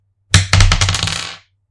The sound of a bolt dropping